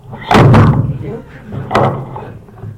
Fall microphone
microphone, noise